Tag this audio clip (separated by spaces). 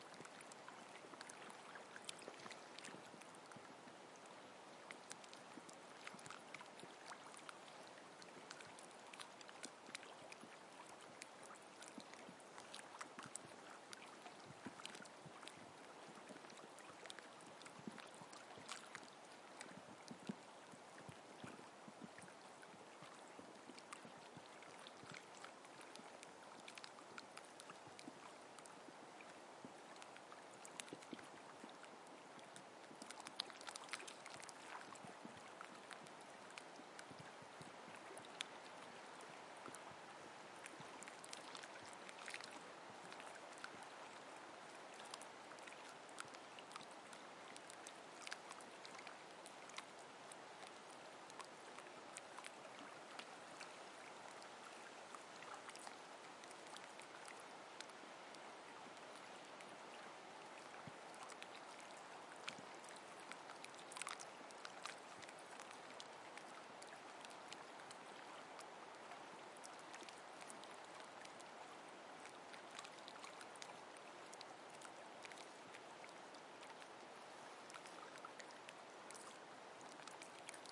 arna beach cliff field-recording H h4n lake llesj nature Oskarshamn rain shore water wave Wavelab waves wind